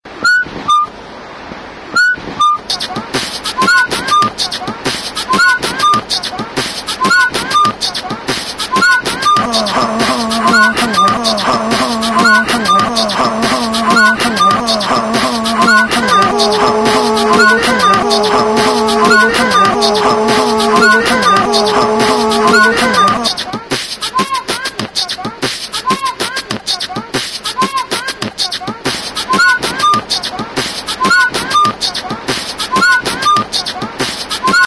Ave Paulista
A kind of ceremonial hip hop related vignette made with beatbox, whistle, and some vocals vaguely remembering south America indigenous chants. A kid yelling on the backgrounds gives an especial spice to the mix. Try it and dig the feeling.
Made in a samsung cell phone (S3 mini), using looper app, my voice and body noises (on the background, Paulista Ave – Brasil – São Paulo – SP – and a yelling boy that was on the Ave).
alternative, art, artistic, artsy, beatbox, cool, dada, dadaism, hip-hop, hiphop, improvise, improvising, lo-fi, lofi, loop, looping, noisy, rhythm, vignette, whistle, whistles